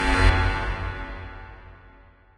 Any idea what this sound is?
UI Wrong button3
game button ui menu click option select switch interface
button, click, game, interface, menu, option, select, switch, ui